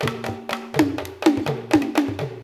Drum Circle Loop 1
Drum Circle Loop
AuDioChosis - Steven F. Allen
#AudioChosis #MemoryStain #WindTrystFarm #StevenFAllen #StateCollegePA
Drum Circle Loop
Recorded at WindTryst Farm State College PA
AudioChosis, BellefontePA, MemoryStain, StateCollegePA, Steven-F-Allen, WindTrystFarm